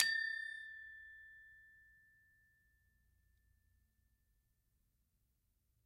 Bwana Kumala Gangsa Kantilan 17
University of North Texas Gamelan Bwana Kumala Kantilan recording 17. Recorded in 2006.
bali, gamelan